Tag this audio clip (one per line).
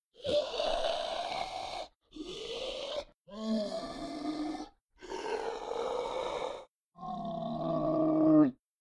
growl
snarl